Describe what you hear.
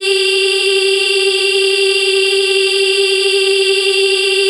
These were made for the upcoming Voyagers sequel due out in 2034.